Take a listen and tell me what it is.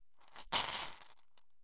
marbles - 15cm ceramic bowl - shaking bowl ~10% full - ~13mm marbles 01
Shaking a 15cm diameter ceramic bowl about 10% full of approximately 13mm diameter glass marbles.